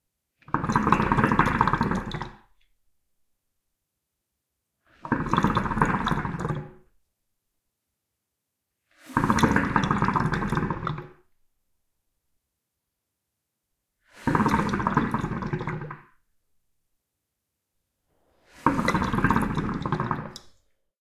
Hookah bubling
Smoking hookah
Portable Recorder Tascam DR-22WL.